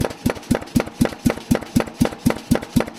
Power hammer - Billeter Klunz 50kg - Quantized exhaust vent 12 hit
Billeter Klunz 50kg exhaust vent quantized to 80bpm (orig. 122bpm) with 12 hits.
1bar, 80bpm, air, billeter-klunz, blacksmith, crafts, exhaust-vent, forging, labor, machine, metalwork, motor, power-hammer, pressure, quantized, tools, work